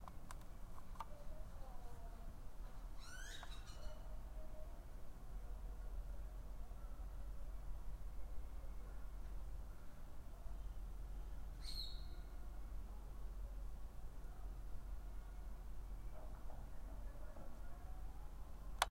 022 House BackYardAmbient
backyard ambient sound